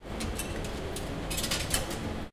Inserting Coins machine
Sound of coins getting inside and dropping inside a payment machine in a car park.